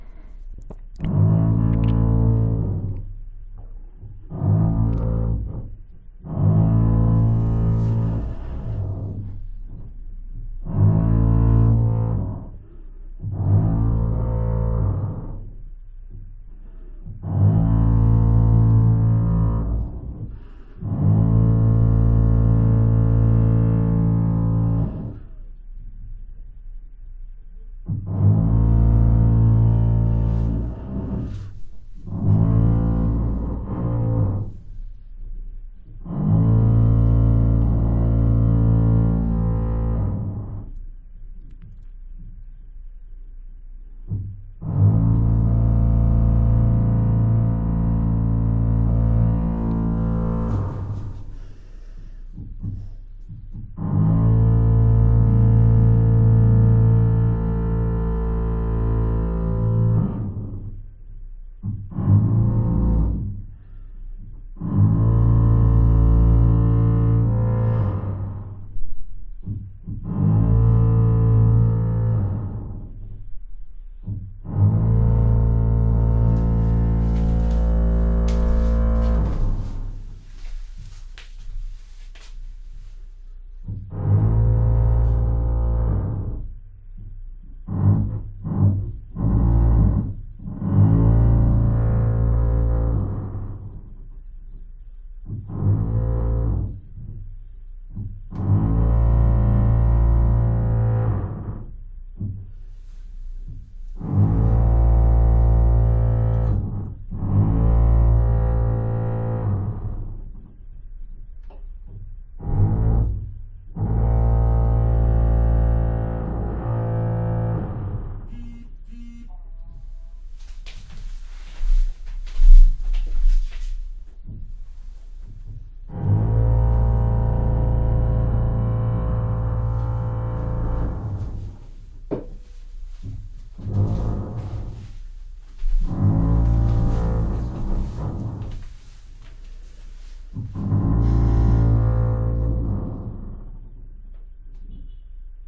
Mi vecino taladrando en su casa. My neighbor drilling in his home.